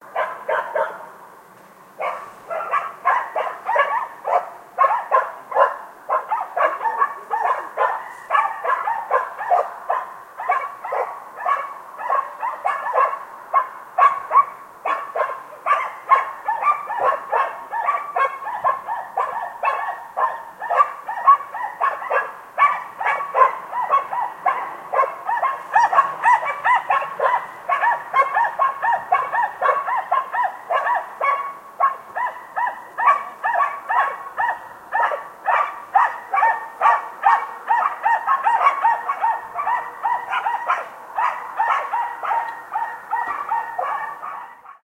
distant-dogs-barking-sound-effect
asdadads, asdads